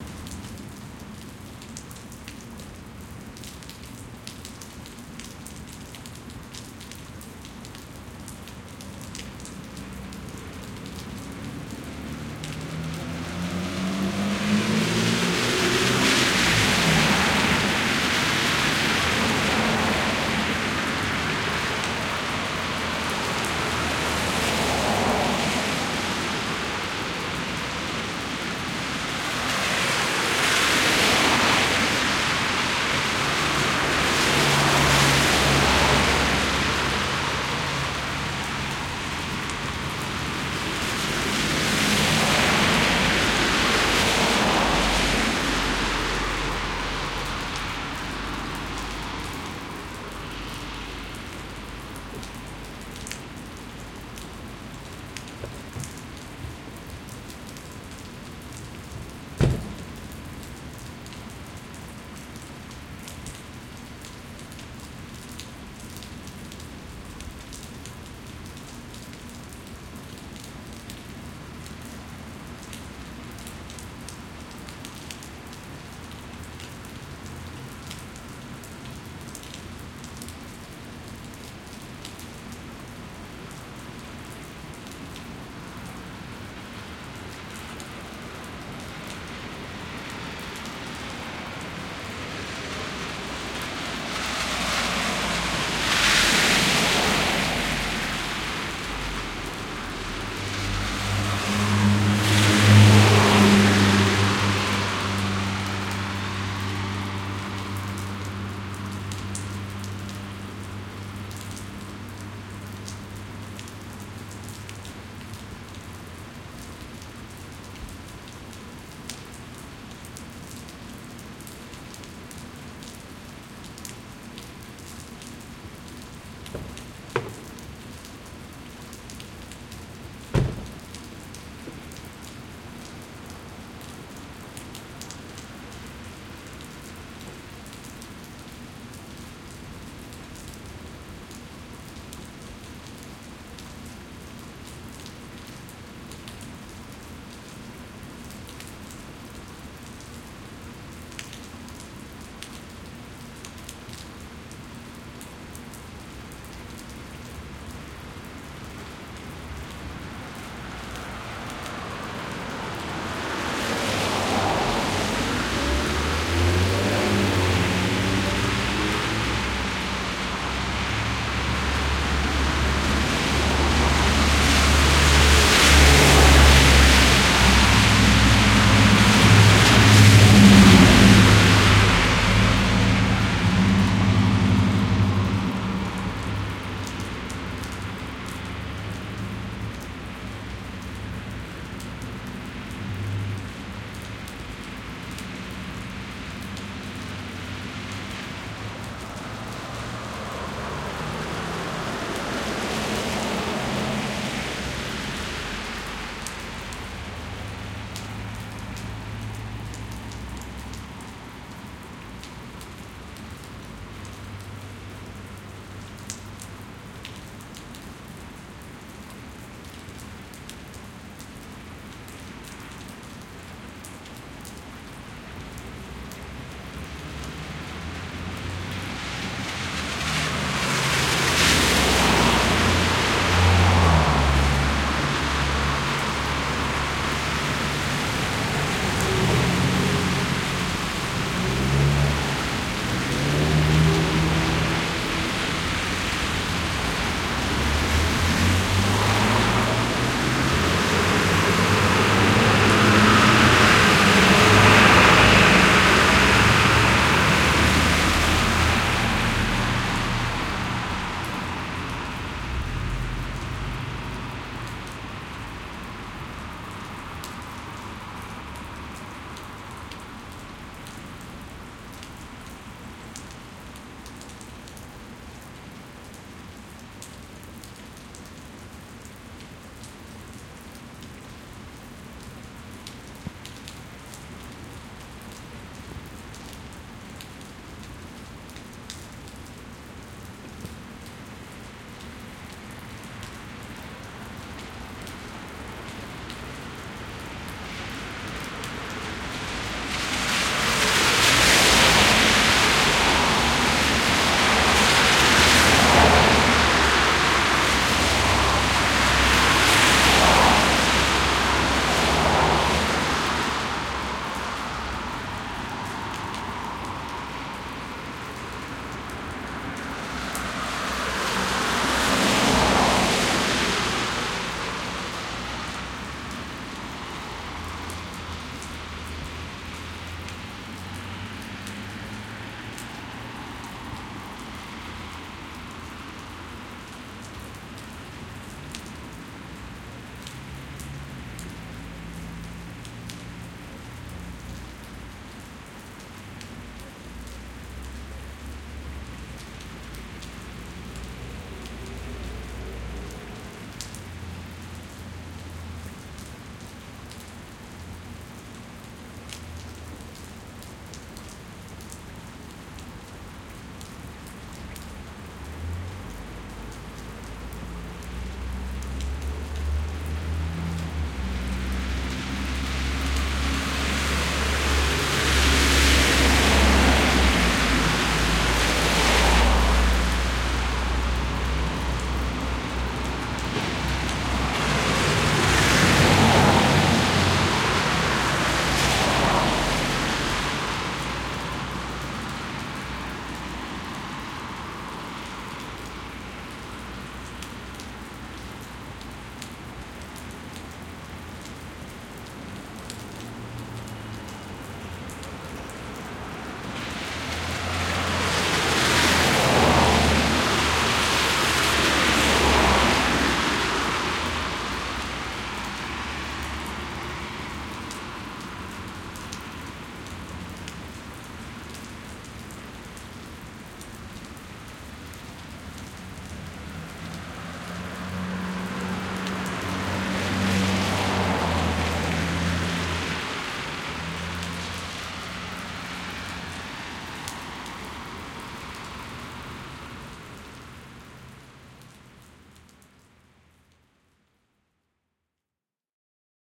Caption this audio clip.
2012-04-05 rainy night by a bank
On a rainy evening I set up my mics under a roof near a street and recorded the traffic going past. The street is one way and the traffic passes from right to left. Recorded with AT4021 mics into a modified Marantz PMD661 and edited with Reason.
bus, cityscape, drip, field-recording, phonography, rain, splash, street, traffic, truck